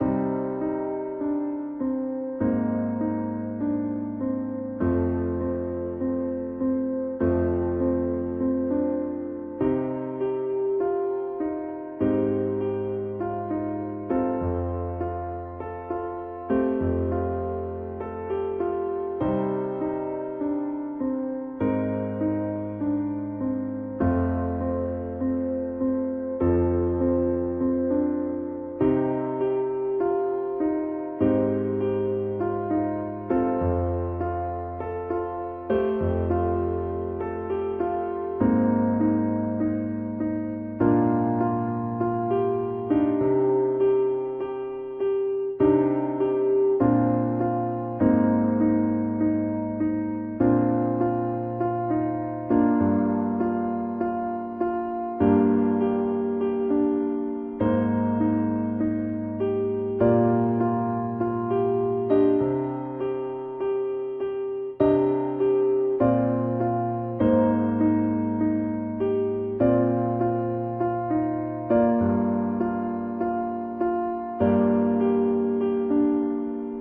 piano loop 220727
A piano loop
music, piano